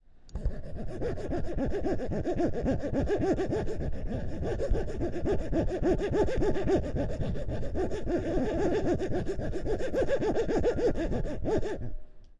Horror; disturbing saw; close; muffled
Recording of a metallic XLR cable rubbing against another cable to simulate a muffled saw sound.